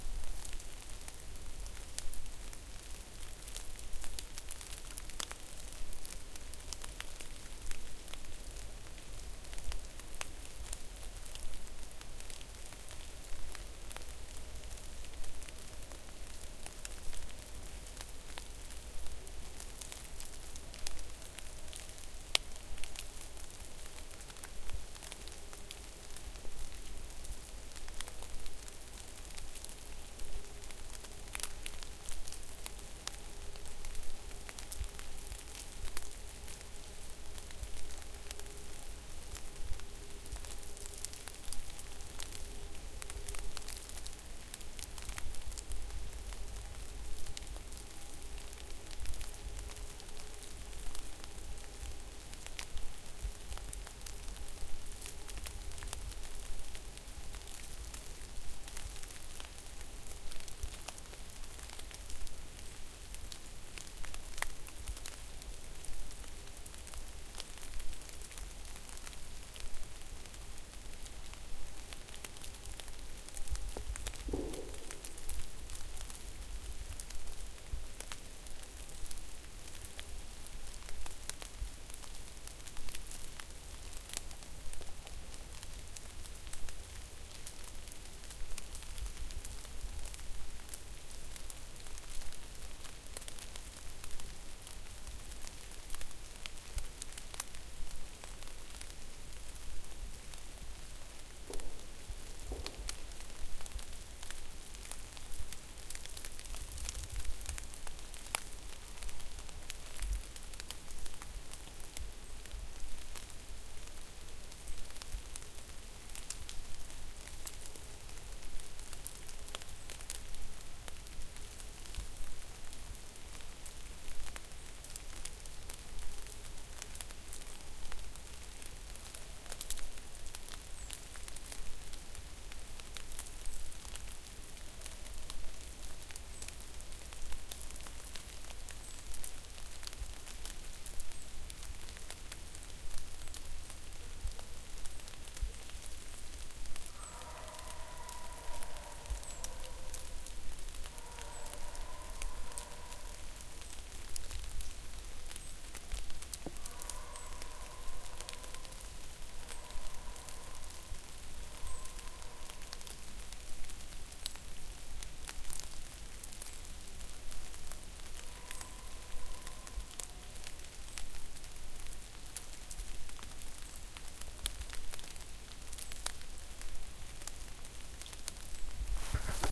Ambiece of the forest after winter, you can hear the dripping water from the trees.
ambient, forest, republic, field-recording, ambience, ice, nature, winter, water, spring, czech, quiet